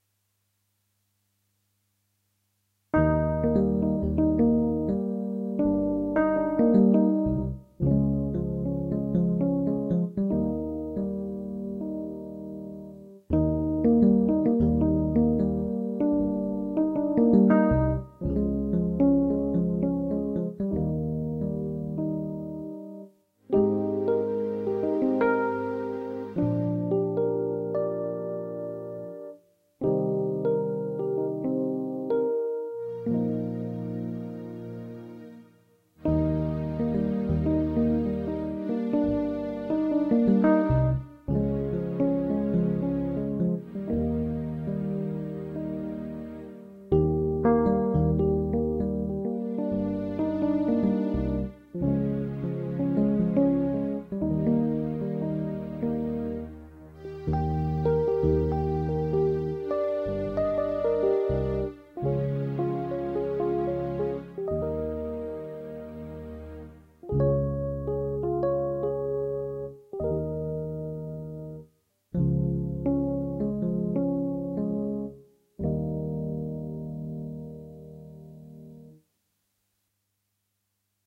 Melodic wistful tune with a romantic feel. Intro or theme music or interlude.
Dance, Love, Film, melodic, Wistful, Romantic, Theme, Movie, Waltz, Interlude, Transition